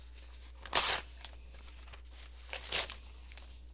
Paper Rip
Me ripping a piece of paper out of a old College Ruled notebook.
writing, ripping, rip, tearing, notebook, tear, paper, office, tearing-paper